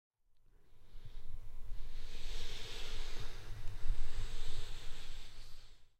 male breaths in
male, breath-in